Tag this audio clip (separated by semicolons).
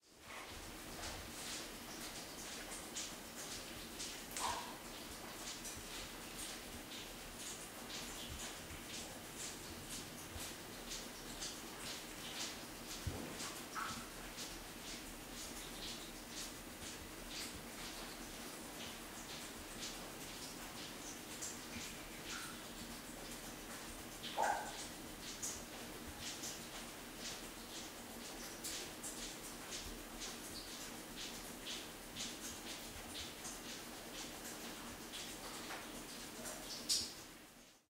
falling; paris